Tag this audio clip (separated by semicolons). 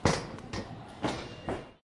Cologne; Field-Recording; grid; people; steps; University